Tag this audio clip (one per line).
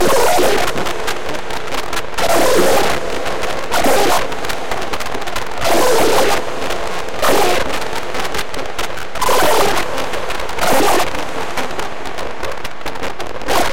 arpeggio
resonance
chords
loop
distortion
synthesizer
arp
chord
synth
static